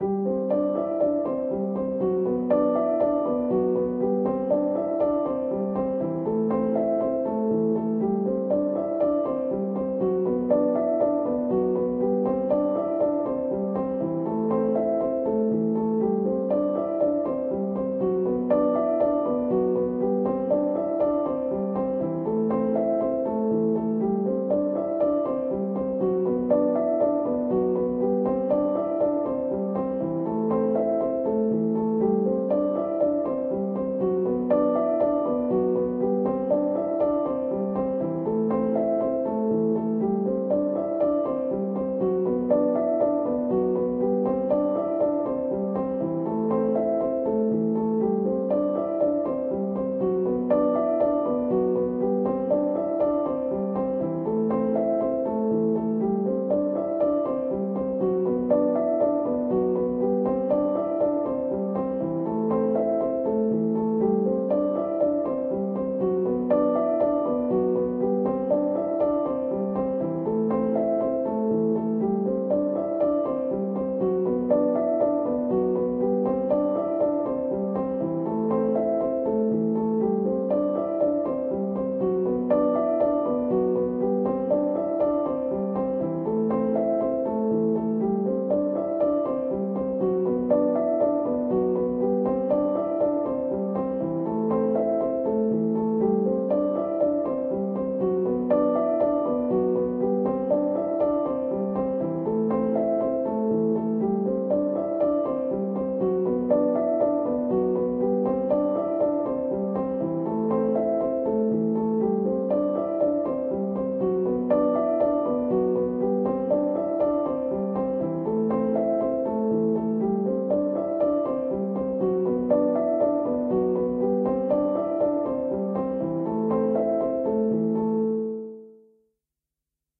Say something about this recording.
Piano loops 033 octave long loop 120 bpm
120, 120bpm, bpm, free, loop, music, Piano, reverb, samples, simple, simplesamples